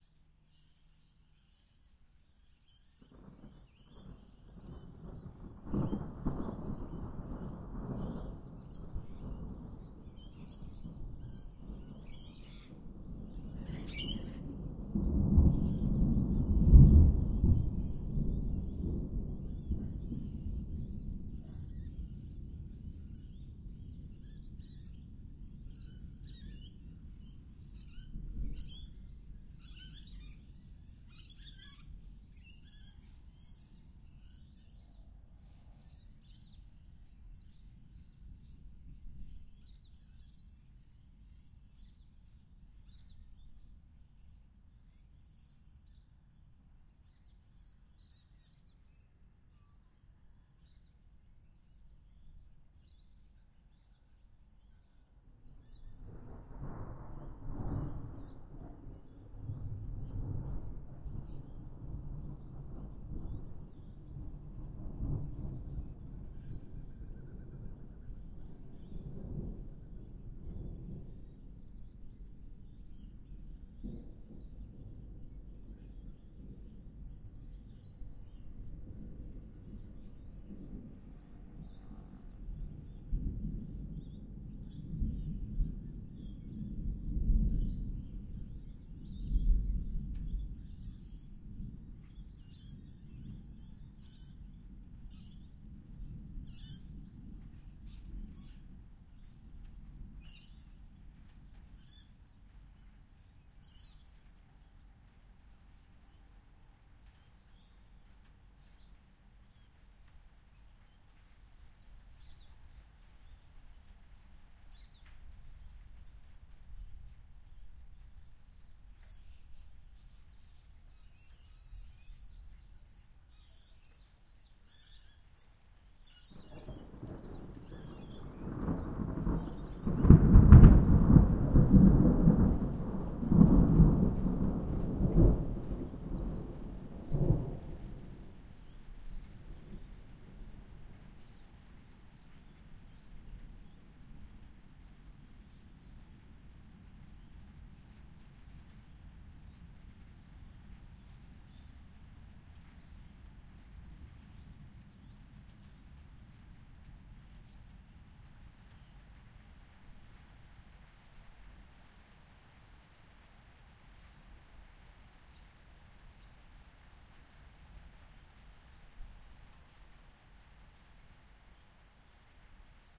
Birds & Thunder 3
I love it when it rains and thunders. Recorded from my back yard (under a veranda). The sounds of parrots and other birds can be heard as the thunder storm rolls in. Recording chain: AT3032 stereo mics (50 cm spacing) - Edirol R44 (digital recorder).
atmosphere, bang, birds, boom, field-recording, nature, parrots, rain, storm, thunder, weather